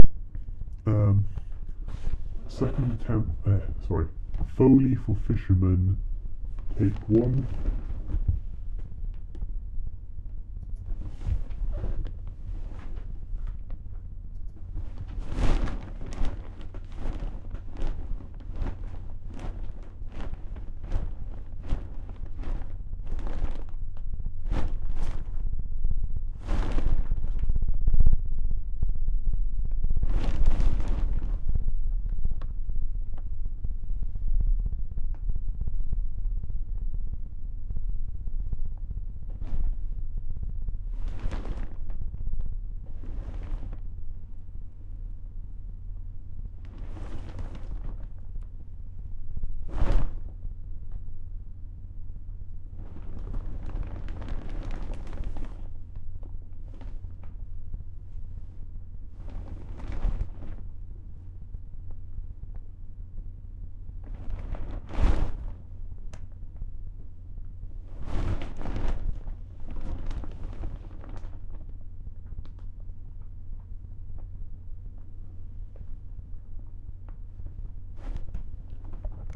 a plastic sheet being bent repeatedly but with some high frequencies eliminated